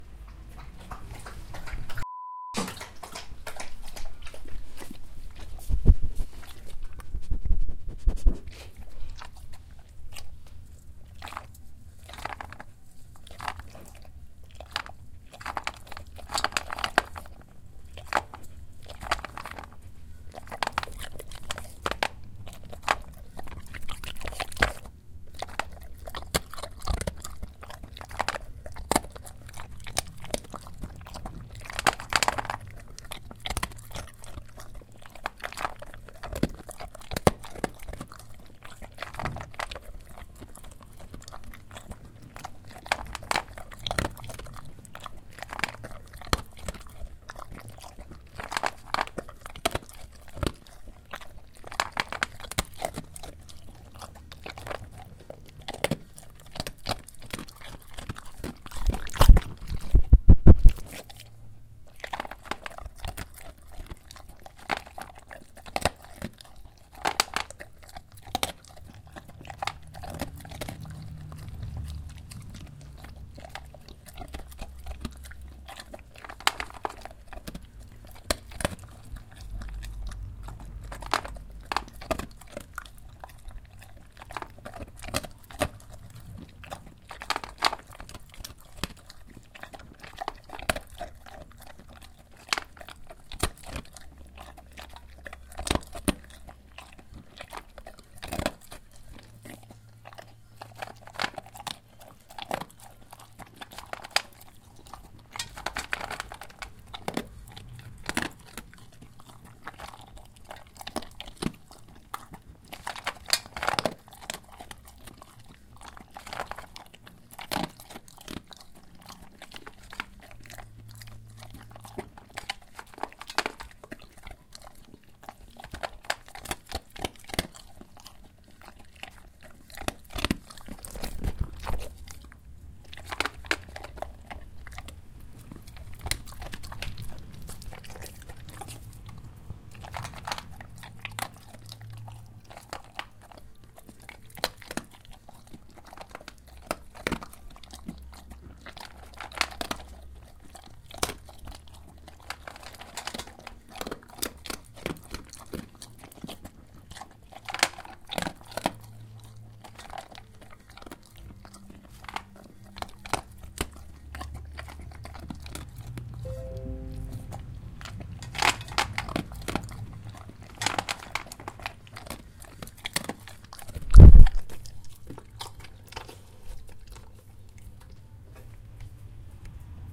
Dog eating and drinking - Tascam DR40
My dog drinking and eating dog food from her bowl. Close recording with Tascam DR-40.
dog, eating-dog, field-recording, bernese-mountain, dog-food